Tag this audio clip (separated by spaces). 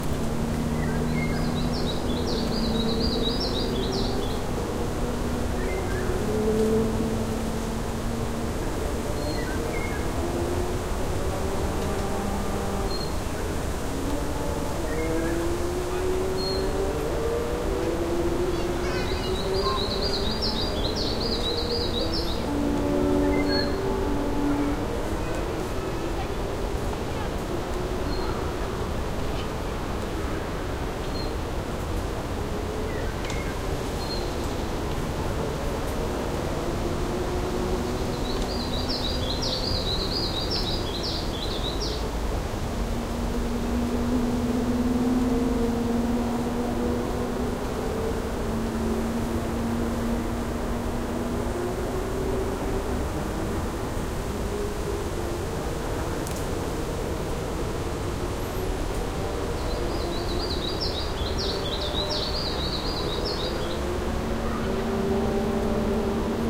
Omsk Russia athmosphere birds forest noise park victory-park